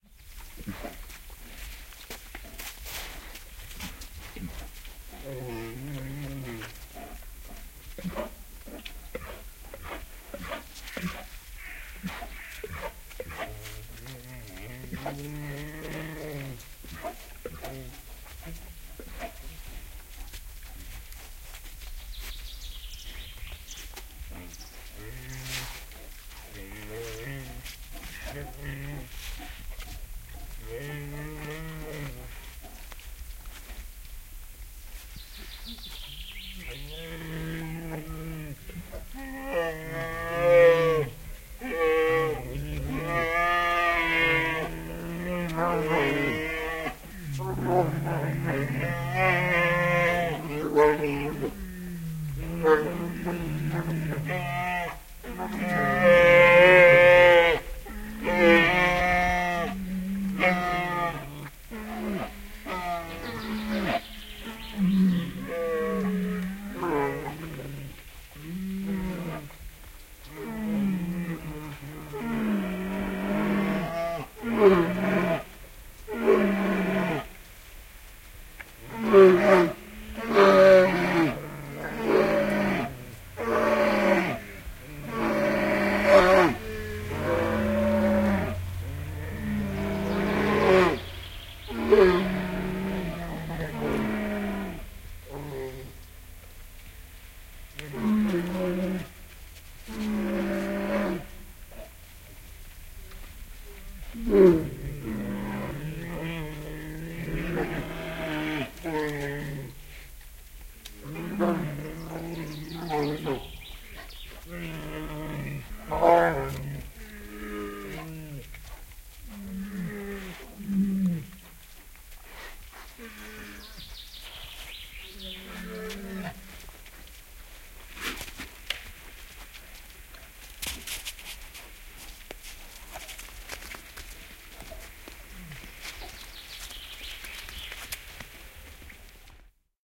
Karhut syövät ja murisevat / Bears eating and growling in a zoological park, brown bear. Spring, birds in the bg.
Karhut syövät, tuhinaa ja maiskutusta, tassuttelua lumessa. 40" alkaen voimakkaampaa ääntelyä, mylvimistä ja murinaa. Lopussa tassuttelua. Taustalla vähän alkukevään lintuja, hyvin vähäistä vaimeaa liikennettä.
Paikka/Place: Suomi / Finland / Ähtäri (eläinpuisto)
Aika/Date: 22.04.1975